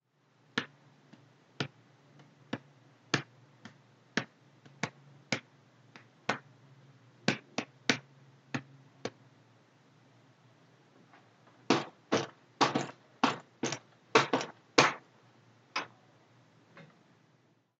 Sonido de golpes.